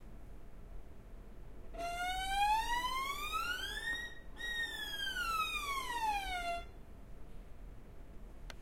Eslide updown fast
Slide effect was created with a standard wood violin. I used a tascam DR-05 to record. My sounds are completely free use them for whatever you'd like.
depressing, violin, climb, arouse, question, fall, slide, sad, up, stretch, falling, down